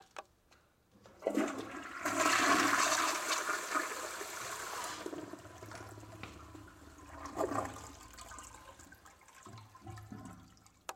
At a bathroom.